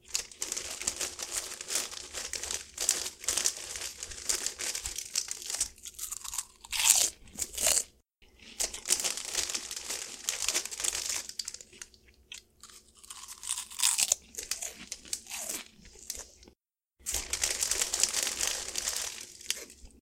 Taking snacks from the package and eating the snacks
bite, chips, crunch, eat, eating, feed, food, snack, snacks